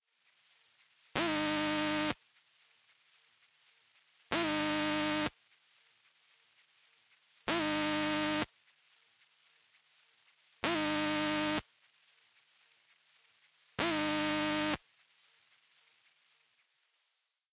CD Walkman - No Disc (Edit)
Induction Coil (Coil Pick-up) recordings of a Sony Walkman CD player with no disc
Electronics, ambience, field-recording, abstract, soundscape